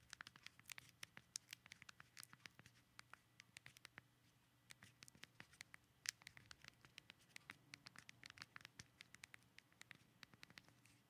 Flip Phone Buttons
Pressing buttons on and old flip phone